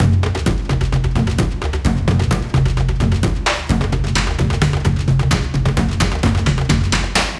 african loop002 bpm130
african, loop, percussion